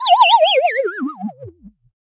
Lose Funny Retro Video Game
Created using the fantastic Chiptone synthesizer.
80s, arcade, dry, fail, funny, game, jump, loose, lose, lost, old, oldschool, power, retro, run, sci-fi, simple, synthesizer, synthetic, video, vintage, web